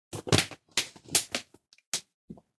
Sounds of the crumpled plastic drinking bottle before throwing out to the trash.
Recorded by the Huawei mobile phone, inside of the room.
Recording date 29.06.2019
waste, throw-out, drinking-bottle, polyethylene, crack, phut, crinkle, rupture, wrinkle, broke, crumpled, crash, crumple, drinking, grind, rumple, creak, burst, bottle, throwout, snap, trash, drink, out, break, screw, throw, plastic